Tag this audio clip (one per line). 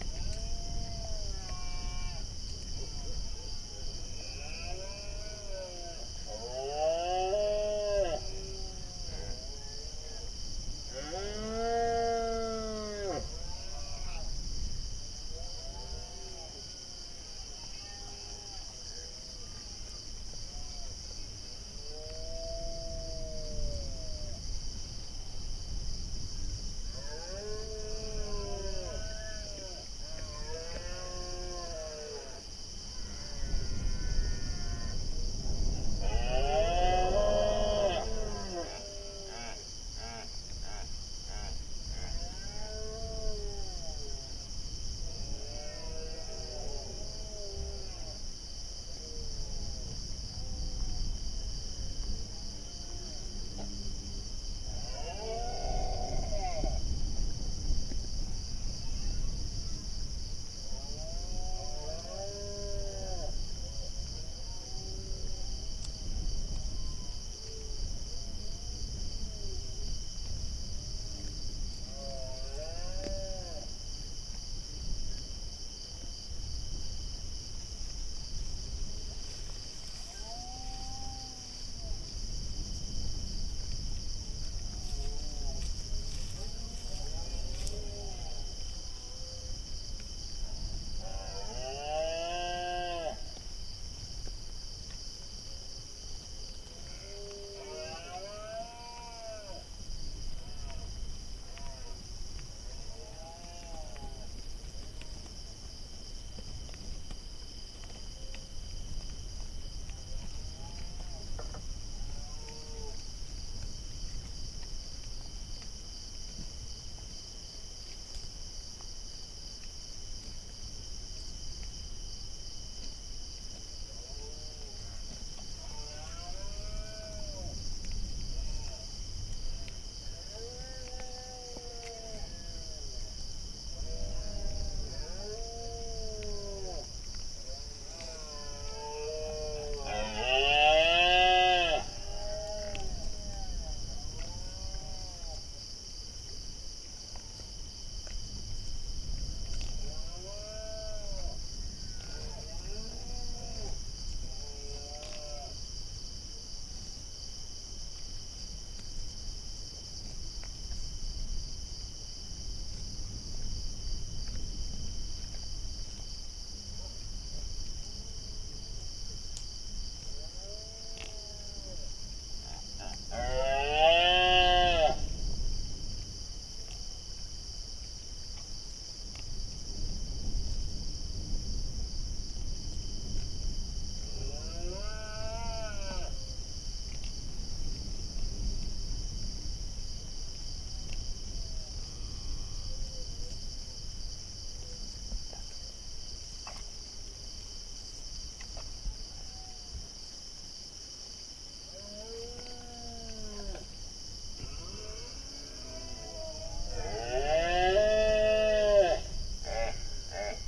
field-recording
nature
night
roar
red-deer
donana
call
mammal
ambiance
male
voice
rut